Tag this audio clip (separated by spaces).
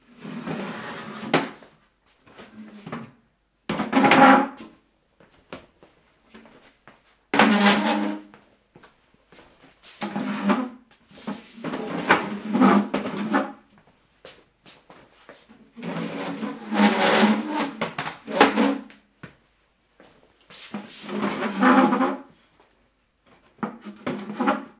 chairs floor wood dragging tiled squeaky furnitures